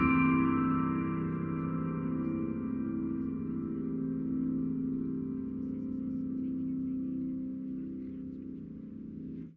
Fading piano chord without initial attack, high chord, some noise in background
drone, high